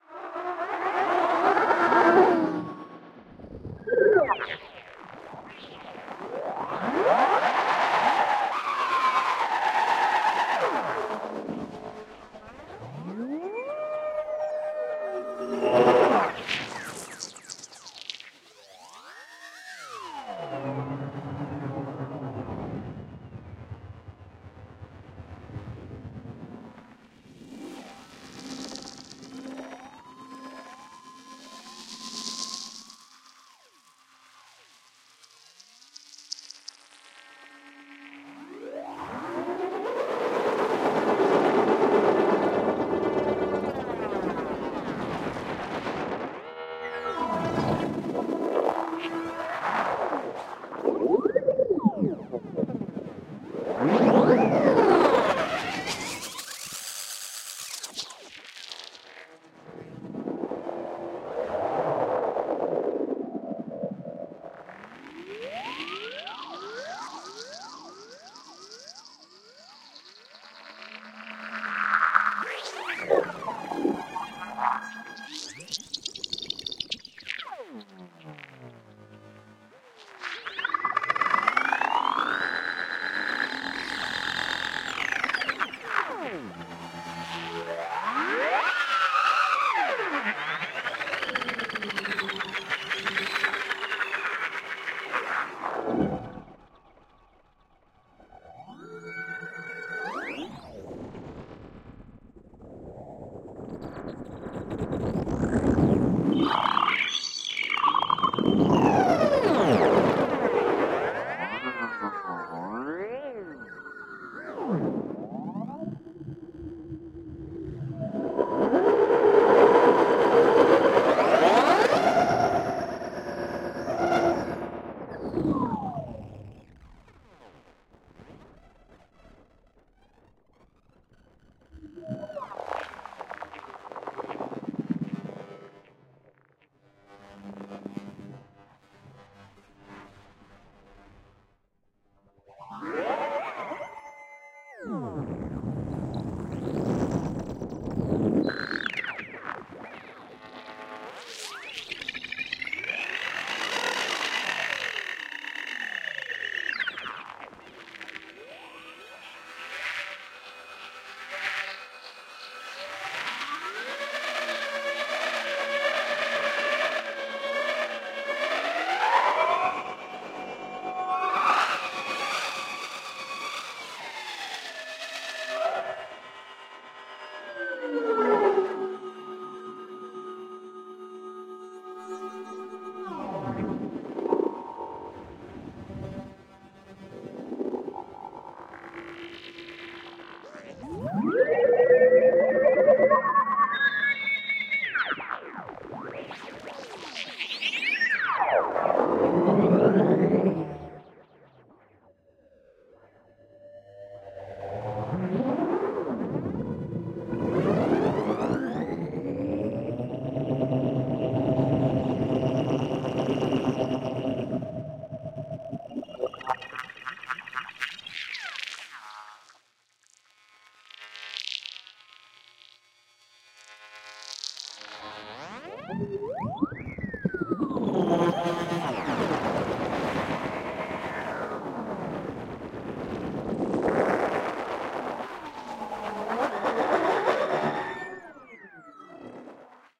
ESERBEZE Granular scape 34
16.This sample is part of the "ESERBEZE Granular scape pack 3" sample pack. 4 minutes of weird granular space ambiance. Another from outer space.
granular, soundscape, electronic, effect, reaktor, drone, space